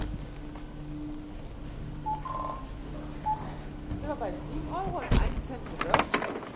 Recorded with Tascam DR-44WL on 19 Nov 2019 Berlin
Supermarket checkout conveyor belt with cash register till
recorded close to conveyor belt
cash-register, conveyor-belt, supermarket, till